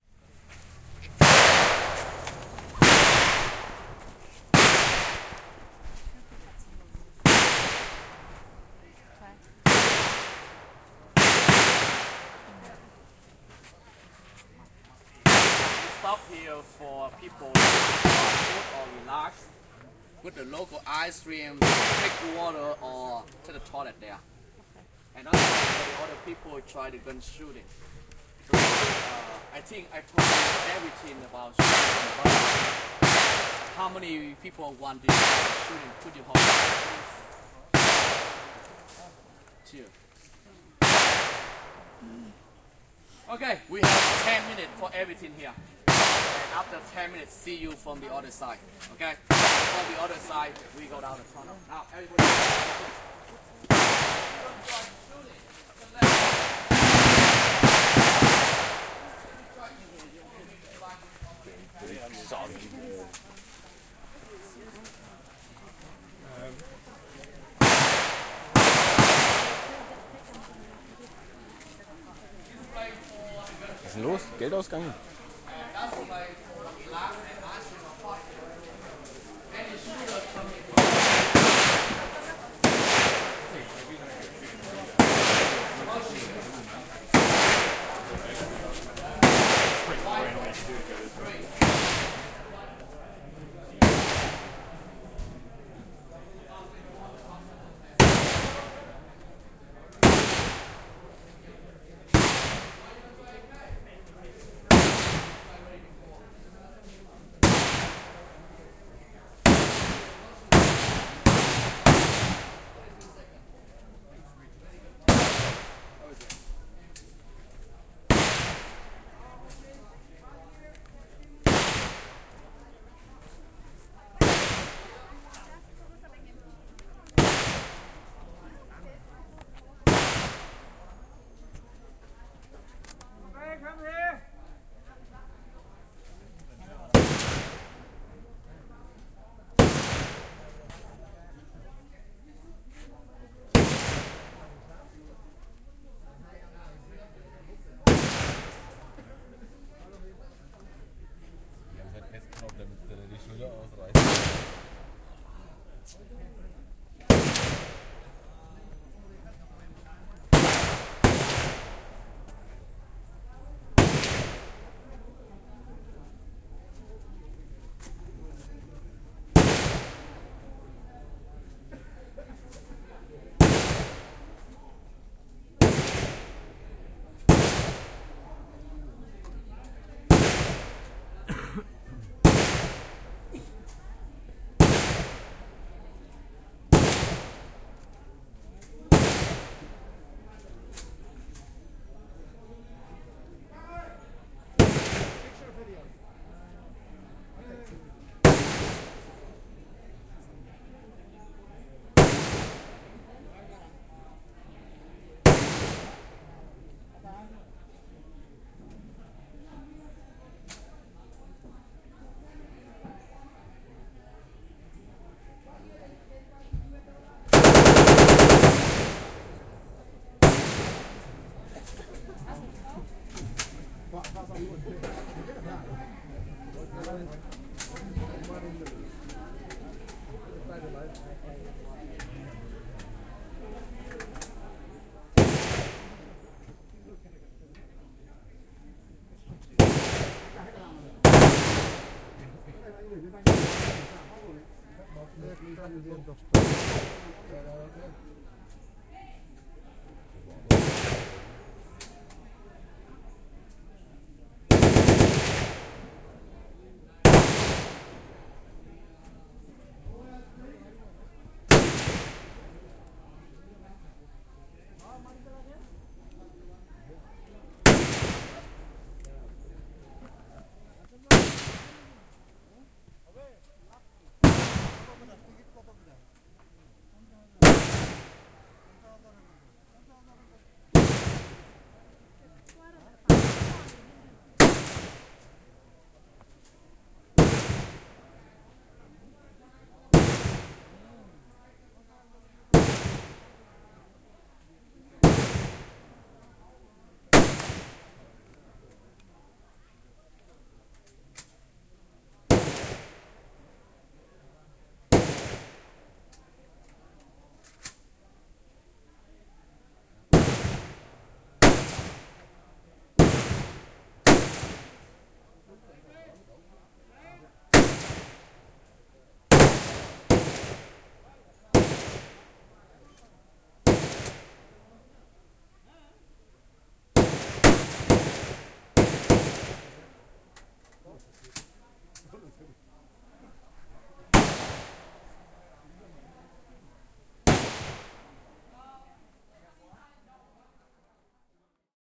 Version with parallel compression - for the extra Drama ;-) !
Recording of the tourist shooting range at the Cu Chi Tunnels, former hideout and supply system of the Vietcong Guerilla. Located north of Ho Chi Minh City / Vietnam.
Sounds of real rounds of AK47, M16, M60 and some other guns I can't specify.
Fun fact: one bullet is about 1.65$ (less for the small guns, more for the bigger ones), so in this recording, approximately 230$ were blown into the air ;-)
Date / Time: 2017, Jan. 09 / 12h43m